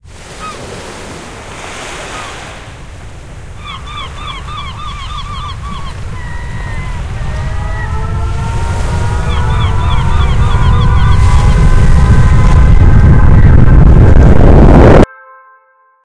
Tsunami in Japan was created with a mix of recorded songs and also the creation of several tracks.
First I generated 3 differents noises (I changed the height of the first one by reducing 2 times 30 half tons, I increased the height at the end for the second one and reduced a little the height of the third noise). I finished by adding reverb (60%) used "Wah Wah" effect and fade out. By doing this, I got the saturation that I wanted, creating the impression of the sound of a real tsunami.
After I used registered songs of seagulls, waves and sirens. I added reverb (39%) for all of my tracks and repeated the song of the siren 3 times. I also used the effect "normalisation" and used the effect "noise decrease" and fade in. I wanted that when the tsunami arrives, the sound looks like an explosion.
Description: Everything was perfect and quiet in this small island of Japan, the beach, the sound of the seagulls ... when suddenly we can hear the trembling sound of sirens.